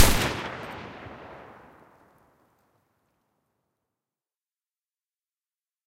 Gun Version 2 without forward bolt
This is the second version without the mechanical bolt. Maybe can be used for bolt action rifles.
Made this is ableton live, it is multilayered with the top end of a 9mm and the low/mid range of an ak47. Another carbine sound is faded in after the transients to give more sustain. Two reverbs fade in and out creating a smooth transition and blend. A little white noise is added for subtle sheen and air.
All the layers were grouped together into a single band compressor and ran through serum fx distortion and then into a krush distortion plugin for added fatness. A blending reverb was used to glue the sounds into the same space and ran into a final transient designer which is adding a little more snap and punch and clipping the excess transients off.